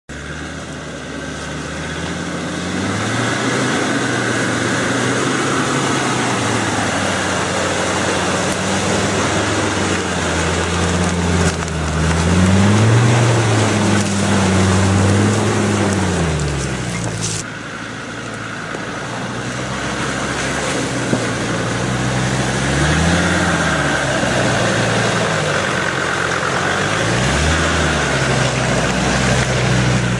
Land Rover Water
Land rover going trough water
car, bumpy